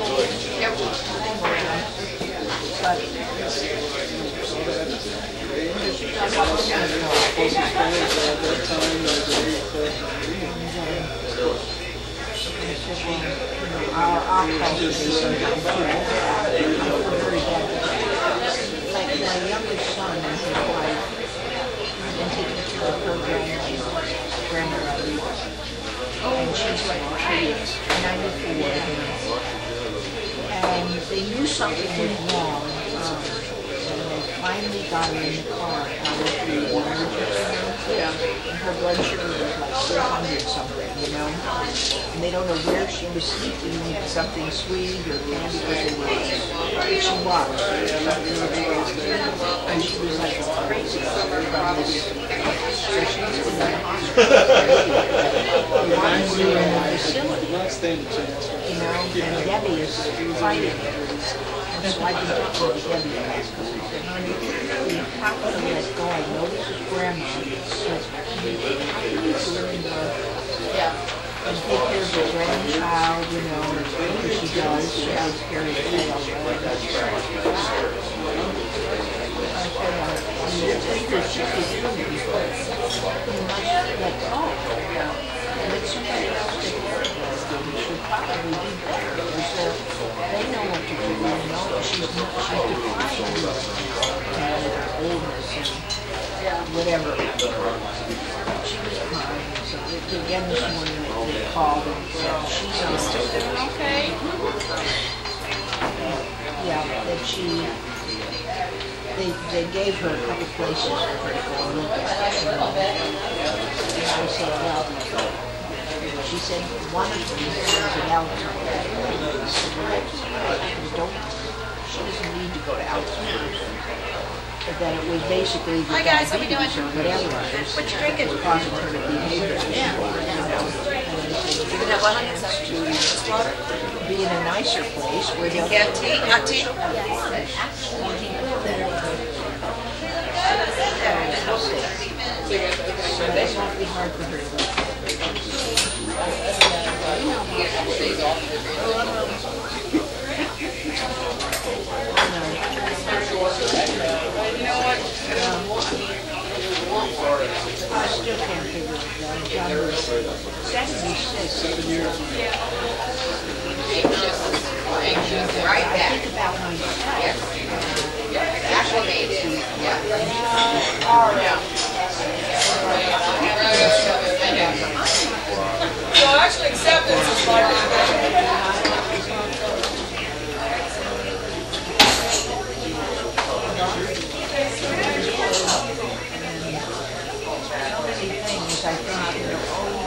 Old style breakfast and idle chatter at the Gun Club Cafe on a weekday morning recorded with DS-40.
ambience, breakfast, field-recording, interior, lunch, restaurant